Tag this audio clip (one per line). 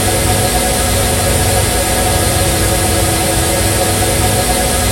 Soundscape
Still
Perpetual